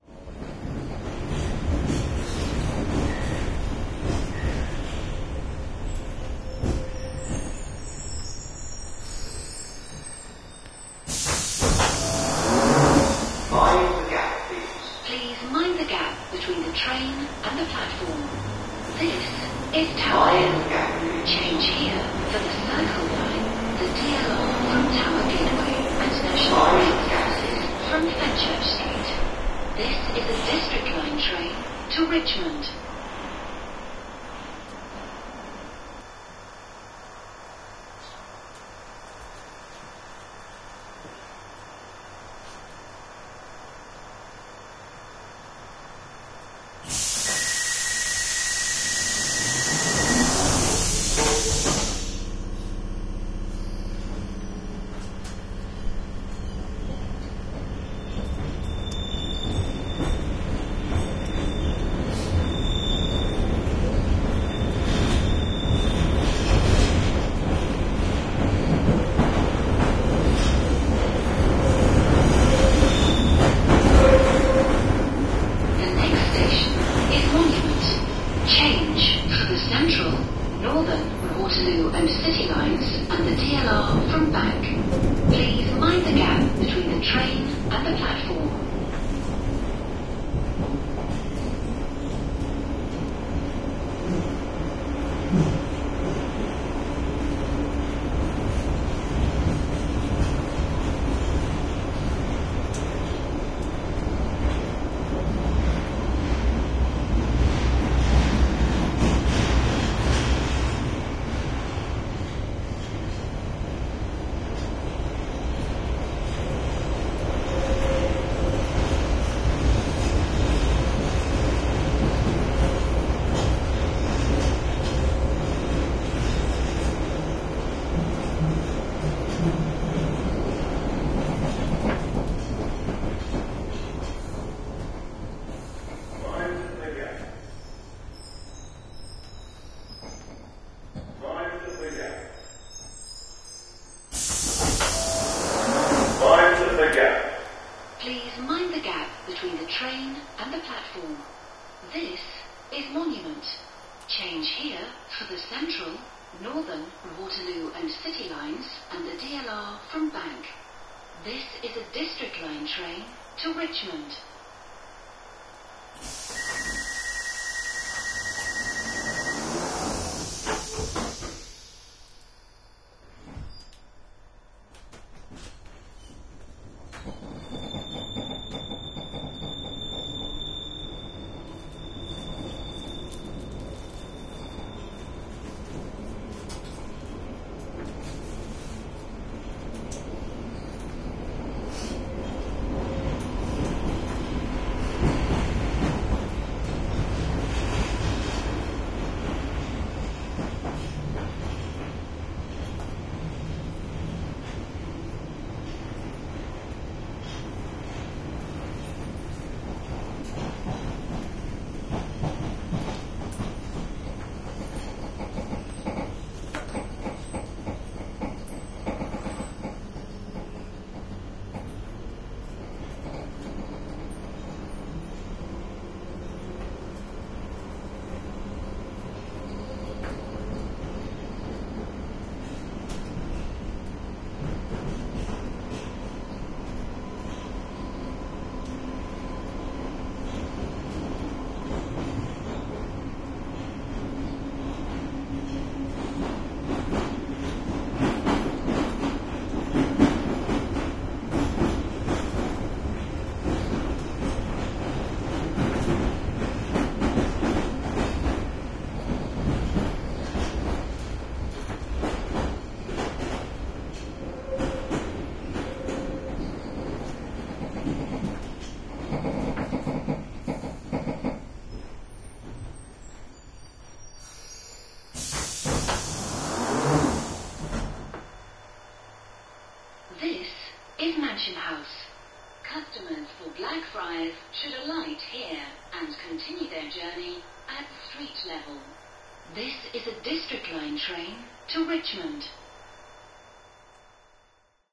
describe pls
Recorded May 30, 2010 on the London Underground. Hear the familar "Mind the Gap" announcement. Field recording using Sony PCM-D50 with internal microphone and windscreen.
Tube, London, Subway, Train, Travel, Doors, Field-Recording, Stereo, Underground, Announcement